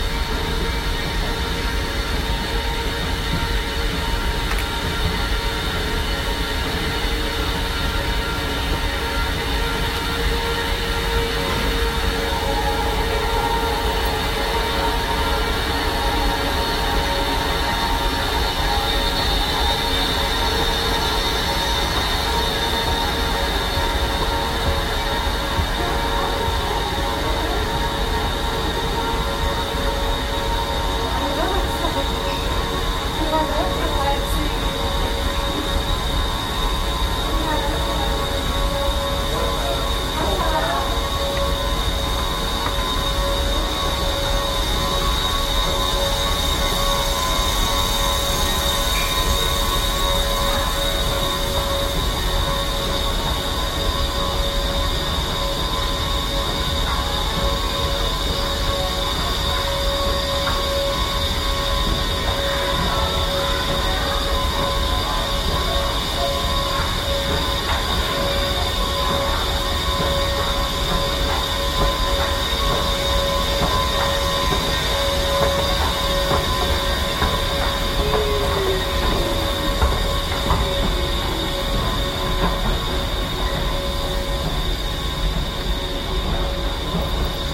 Noisy Escalator
An long escalator I recorded in Atlanta. The escalator was very noisy, and produced a kind of whining electrical sound that sounded almost spiritual. I do not know if the transcendent beauty of the escalator is captured sufficiently here, but I figured someone might be able to use it! There are a few faint voices in the background.